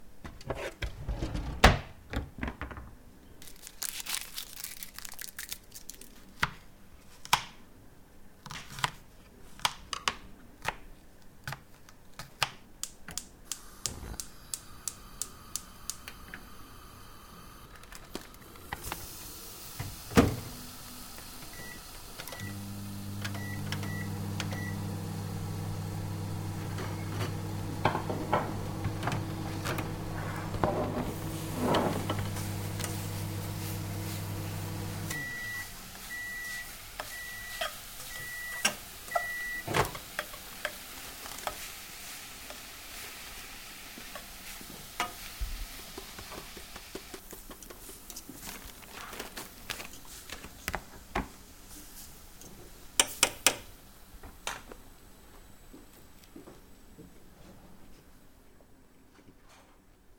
Kitchen meal
Mix of sounds while cooking that can be used for any kitchen scene.
Beep, chopping, Cooking, Household, Hum, Kitchen, Microwave, Mono